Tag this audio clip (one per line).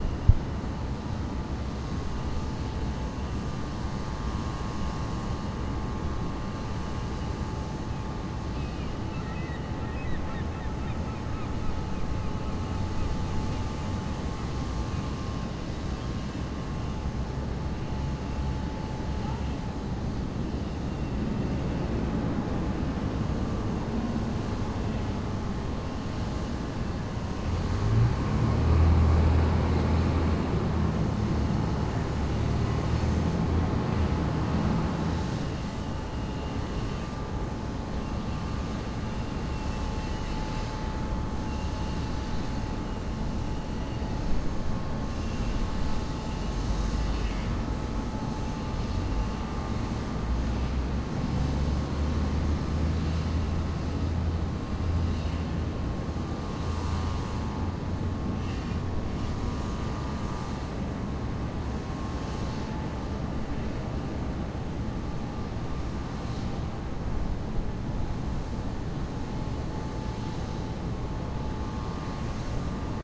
drone
elevated
construction
machinery
ambient
city
field-recording
seagull
downtown